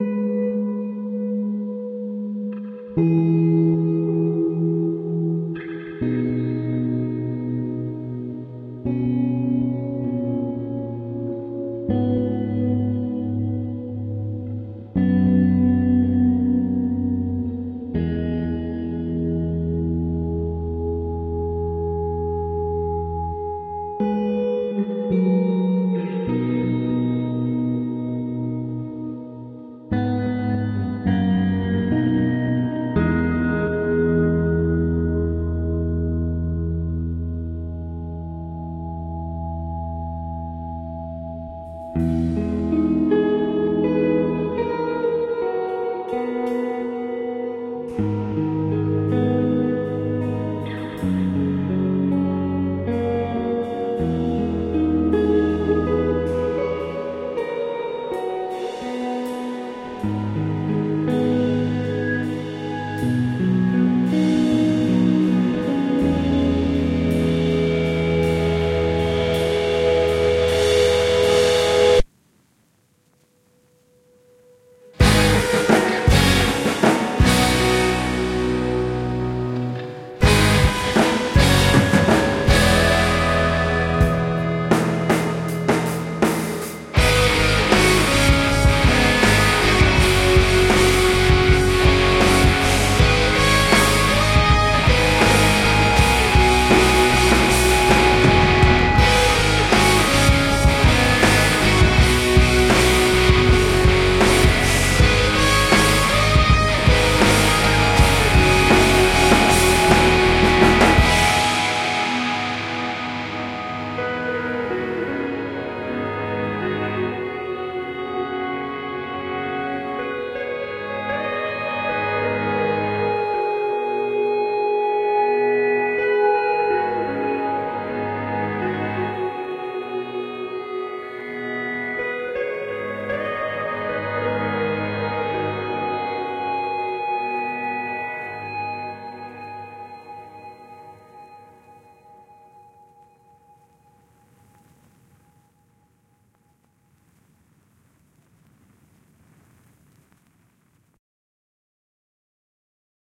Indie Punk Instrumental
Generic Indie band instrumental with very long guitar intro